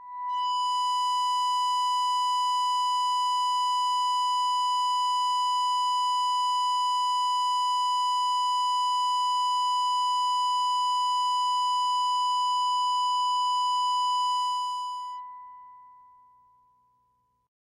EBow Guitar B5 RS
Sample of a PRS Tremonti guitar being played with an Ebow. An Ebow is a magnetic device that causes a steel string to vibrate by creating two magnetic poles on either side of the string.
ambient, b5, drone, ebow-guitar, melodic, multisample